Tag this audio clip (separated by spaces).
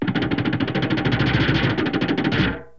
dark distorted distortion drone experimental noise perc sfx